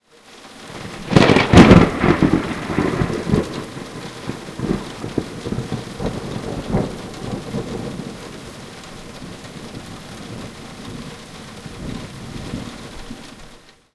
Thunder, Very Close, Rain, A
Raw audio of a close thunder strike with rain in the background.
An example of how you might credit is by putting this in the description/credits:
The sound was recorded using a "H1 Zoom recorder" on 15th September 2016.
thunder, close, rain, storm, very, strike, lightning, weather, thunderclap, thunderstorm